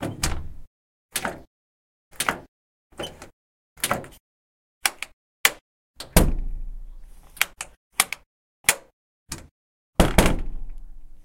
Open close lock unlock door
Open and close lock and unlock door
close, door, lock, open, unlock